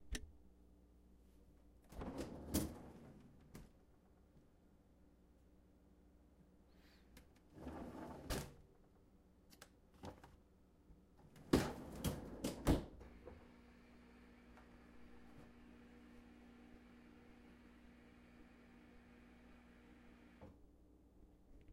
opening and closing fridge
opening and closing the doors on the refridgerator